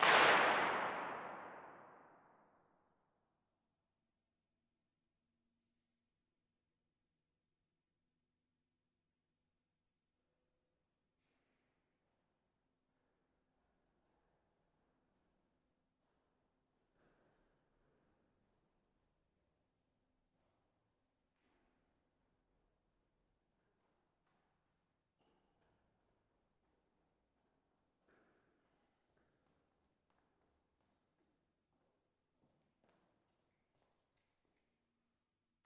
Tower IR high. Recorded with LineAudio CM2s ORTF Setup.